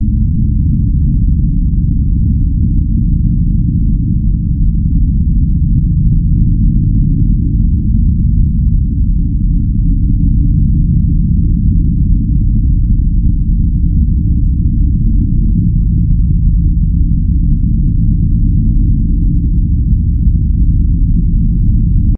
I played arbitrary co-soundings on the Mystic scale (it becomes Mystic Arabian if you mimic Arabian music; otherwise is sounds European).
I applied 300 Hz steep low pass (eq) on an inverse sawtooth soundwave generator.
I applied many delay effects in tandem.
Created on Fruity Loops and re-equed on WaveLab.
Mystic
(has three submodes, scale-shifts [same intervals, overall shifted])
• do, re#, mi, sol, sol#, si
• do, do#, mi, fa, sol#, la
• do#, re, fa, fa# la, la#
(semitonally/fret steps: 0, +1, +3, +1, +3, +1, +3)
(si = ti, do = C)
It is chordable.
atheism, spooky, impersonhood, layer, sample, MIT, kill-the-monarch-of-Spain, hum, sound, background, drone, droning, low-hum, bass